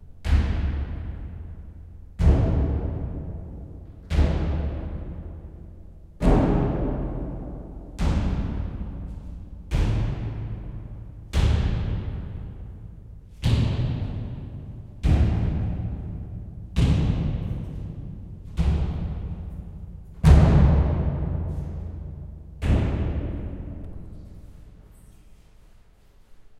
Slow Footsteps With Natural Reverb
Footsteps recorded in a stone chamber with great reverb. It also adds to the sound that the chamber was part of a castle used by the Knights Templar and located right next to the windmills described in Don Quijote. Recorded on a Zoom H4.
castle, chamber, footsteps, majestic, medieval, reverb